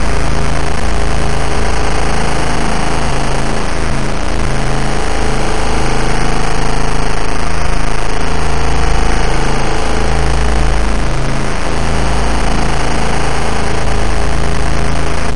Waves Gone Bad
8, Bit, Constant, Crush, Decimator, Generator, Signal